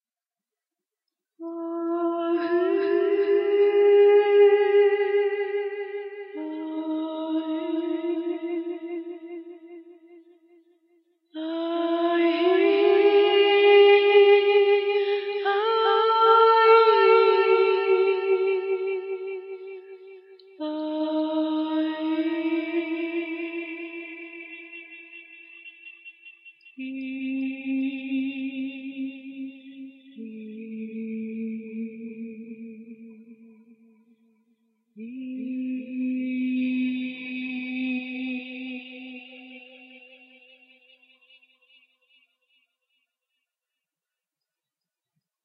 ah heee
This is a recording of me singing wordlessly, with an echo applied.